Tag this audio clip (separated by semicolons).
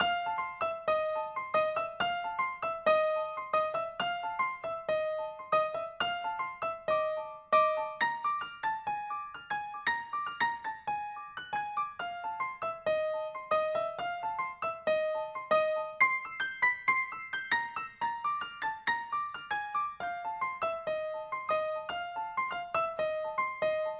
blues; bpm; Fa; HearHear; Piano; rythm